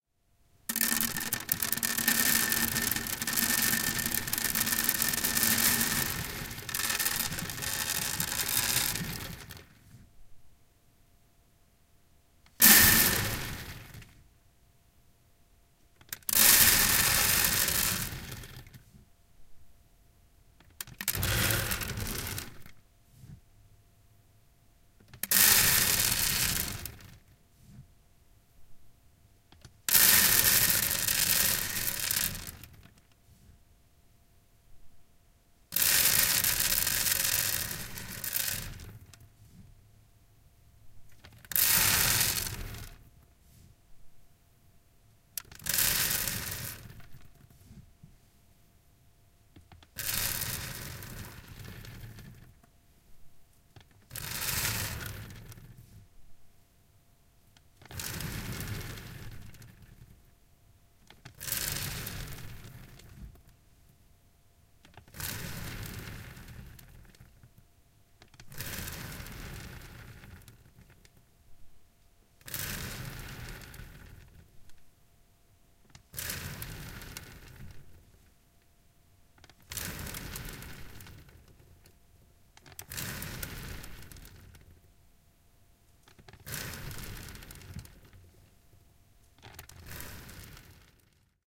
FP Burial In Wooden Coffin
Recording of earth shoveled onto "coffin" from the perspective of the occupant. Made with a home-made binaural microphone attached to a wig head, a box, a plastic tub and corn.
binaural, burial, horror